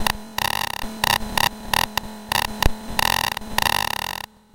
bad telecommunications like sounds.. overloads, chaos, crashes, puting.. the same method used for my "FutuRetroComputing" pack : a few selfmade vsti patches, highly processed with lots of virtual digital gear (transverb, heizenbox, robobear, cyclotron ...) producing some "clash" between analog and digital sounds(part of a pack of 12 samples)